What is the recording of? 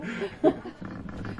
people laughing outdoors 005
man and woman laugh together outdoors